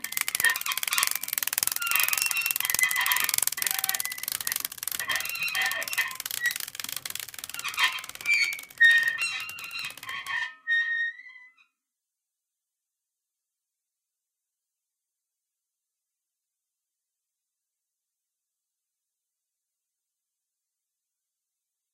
I needed a creaky crank for "Once Upon a Mattress" when the Queen lowers the bird cage. So a layered a squeek sound with a crank sound. Sounds used 60082 and 78937.